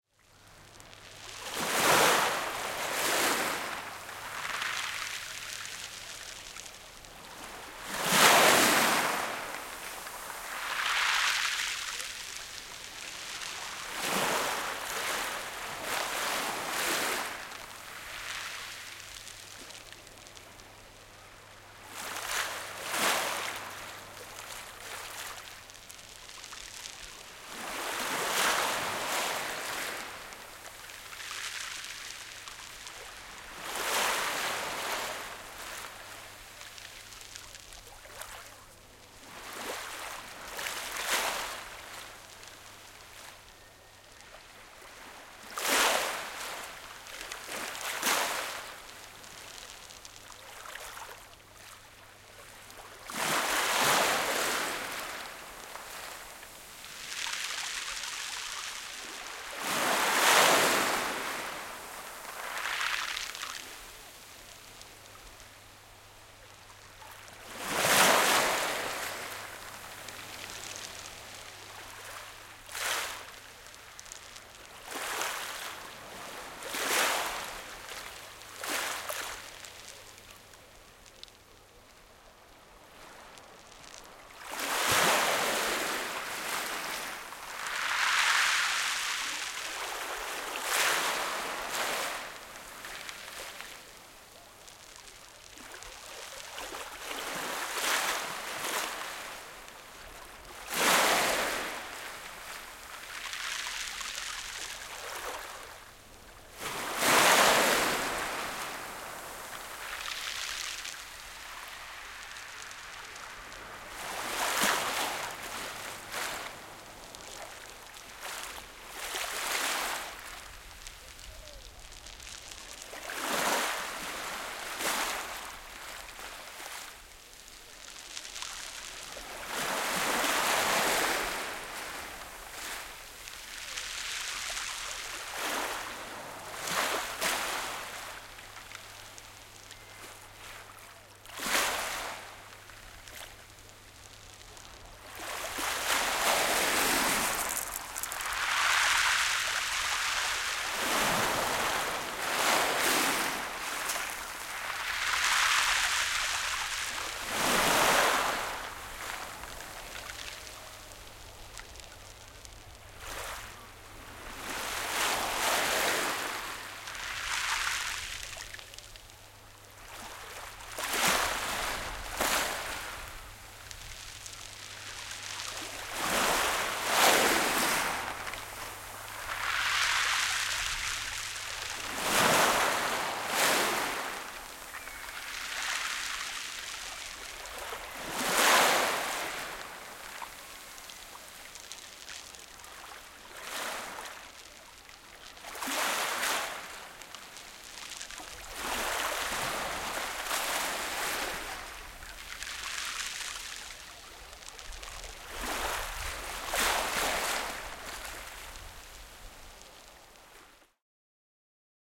Sea Water on pebbles
Close-up recording of the peculiar sound made by water washing the pebbles on the beach of Etrétat (Northern France).
sea, beach, pebbles, water, seashore, field-recording